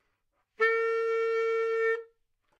Sax Baritone - B2 - bad-richness
Part of the Good-sounds dataset of monophonic instrumental sounds.
instrument::sax_baritone
note::B
octave::2
midi note::35
good-sounds-id::5526
Intentionally played as an example of bad-richness